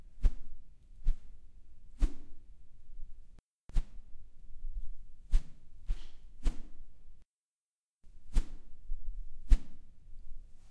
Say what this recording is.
Swoosh air sound. Recorded on Zoom H6.